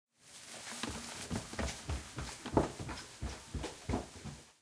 Running Upstairs
This is simply a recording of me running up carpeted stairs, with the microphone stationary at the bottom, ideal for foley purposes. Enjoy :)
Carpet, Foley, Footsteps, Lightly, Running, Stairs, Upstairs